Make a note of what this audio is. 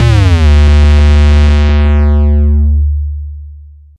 Jungle Bass Hit D1
Jungle Bass [Instrument]
Instrument, Jungle